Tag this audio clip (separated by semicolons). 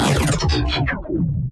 granular; sounddesign; synthesis